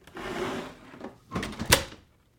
Door-Wooden-Heavy-Close-02
Here we have the sound of a heavy front door being shut.
Close, Door, Front, Heavy, Shut, Wooden